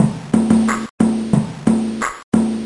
LFS Beat1 90BPM
90 BPM loop of a lo-fi drum beat, as if made by a drum machine with very early PCM sampling.
drums, drum-loop, rhythm, beats, bass-drum, drum, tom, percussion, drum-machine, loop, lo-fi-stupidity, lo-fi, clap, 90-bpm, beat